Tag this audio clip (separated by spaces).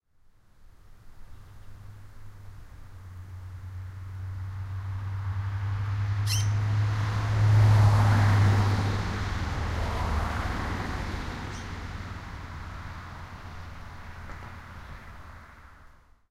car-pass automobile field-recording country-road scared-bird